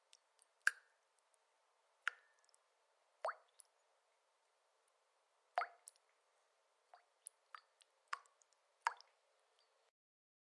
Water splash & drops 2
Water splashing and dropping into a glass.
drip, dripping, droplet, droplets, drops, effect, foley, fx, splash, splashing, splish, Water